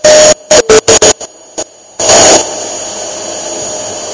TV Break
The sound of a TV having an Error and then smashing.